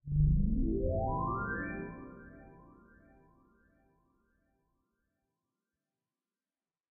A happy sound reminiscent of Paper Mario made with an instrument I synthesized in Harmor VST within Fruity Loops Studio.
Game, Success, Synthesized, Win